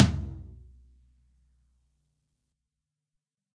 Low Tom Of God Wet 010
realistic, tom, pack, kit, drumset, low, set, drum